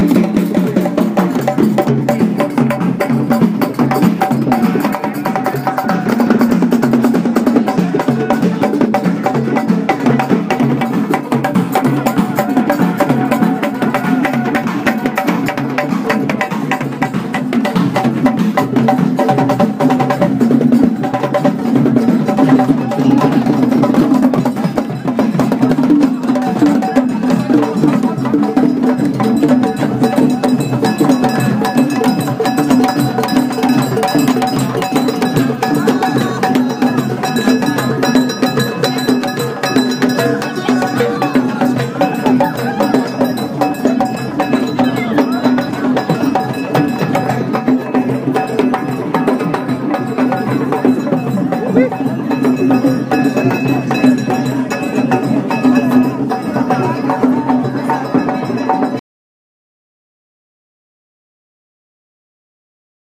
Recorded while walking through Jemaa el-Fnaa on IPhone 4S.